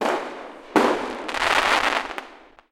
Fireworks - Pop Pop Crackle
These fireworks were recorded on July 4 on the Zoom H4n Pro and cleaned up wind noise on Audacity.